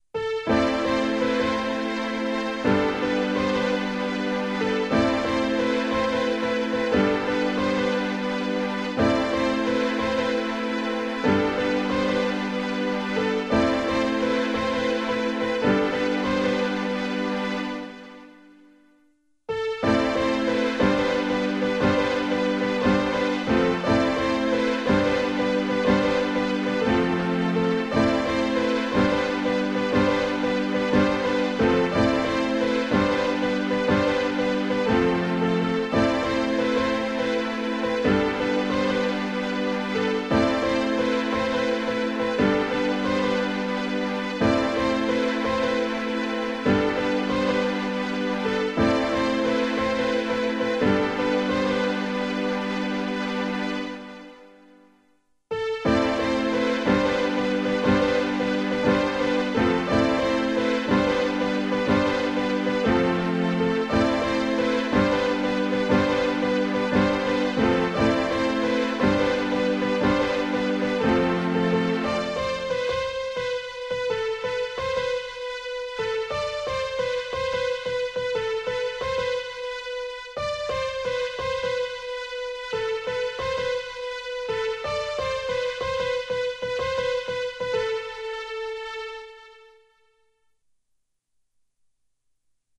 two worlds away
This is a love song i made for my Girl Friend a few months back, i also have a worded version, but i will only be uploading the instrumental. This song was composed entirely by me, and played entirely by me.